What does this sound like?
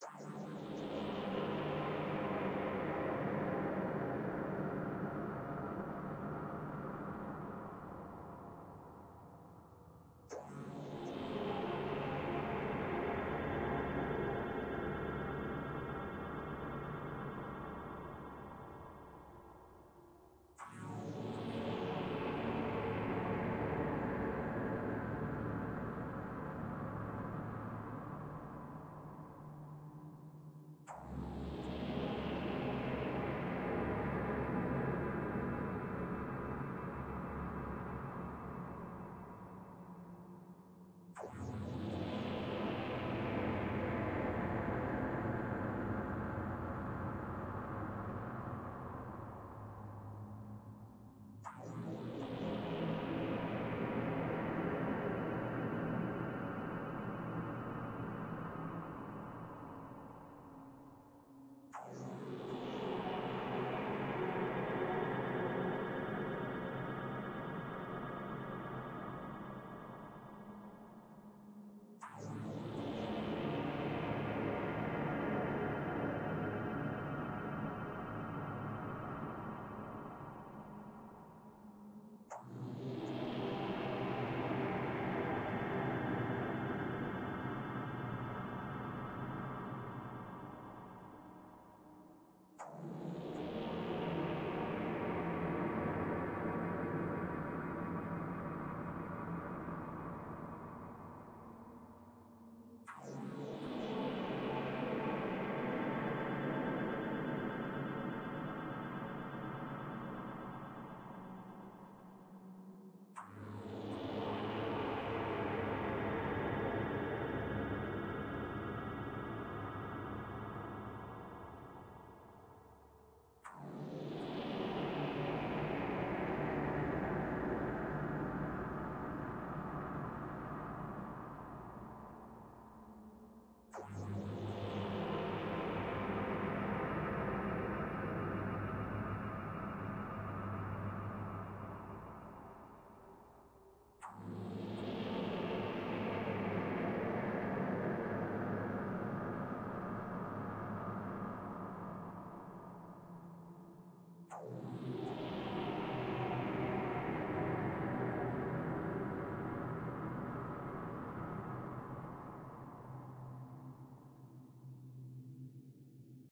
Horror sounds 4
This sound is a fourth set of dark atonal horror pads / stabs.
consequence, halloween, jolt, stab